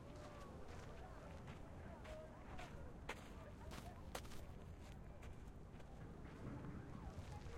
Steps sand
Field recording of 10 steps on the sand of the beach approaching and going away. You can also listen the waves of the sea and the noise of the people in the beach one sunny day.
beach, field-recording, sand, steps, walk